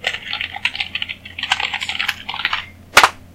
keyboard typing
A typing sound I made with a gaming keyboard.
business, clicking, computer, desktop, hacking, key, keyboard, keys, laptop, office, type, typing, work